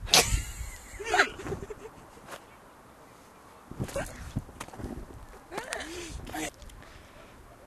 A sudden laughter by a boy and a girl. Female voice is dominant. Recorder live during an interview.